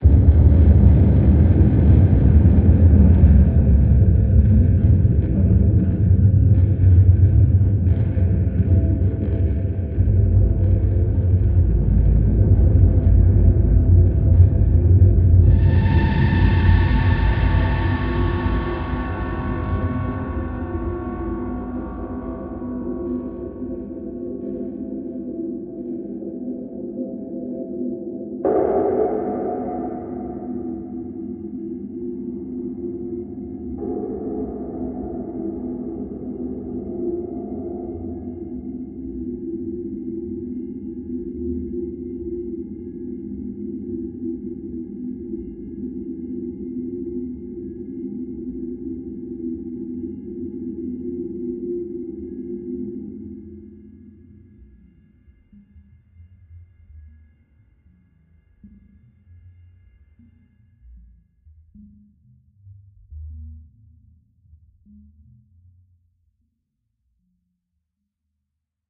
Space ambience: ore mine, deep atmosphere, alien sounds. Hard impact sounds, mechanical screams. Recorded and mastered through audio software, no factory samples. Made as an experiment into sound design, here is the result. Recorded in Ireland.
Made by Michaelsoundfx.
ambience, space